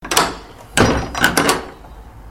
Door, Church, Close, B
Second raw audio of closing a wooden church door with a metal handle.
An example of how you might credit is by putting this in the description/credits:
Church, Clank, Close, Closed, Door, Doorway, Handle, Squeak, Wood, Wooden